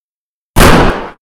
44 Magnum Remixed
This is my 1st attempt at sound editing. I took a .44 Magnum sound, that was fired in an open field with trees, then mixed it so it sounds more like it was fired in a room. I also made it more throaty and loud, to add to the effect.